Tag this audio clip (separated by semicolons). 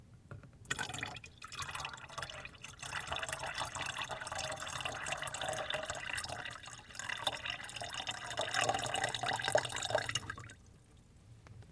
container; jug; splash; water